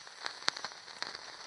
Steel - Hot steel burning wood short
Red hot steel pushed against wood to make a hole, the wood burns and steel gets cold, short.